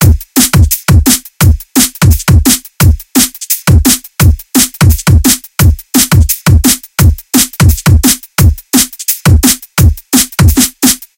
inline - drum-loop-hard-02
172 bpm hard drum and bass drum loop.
drumnbass, drum, dnb